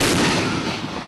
m1a1 abrams canon shot 1
agression, army, attack, caliber, explosion, fight, military, projectile, shot, tank, war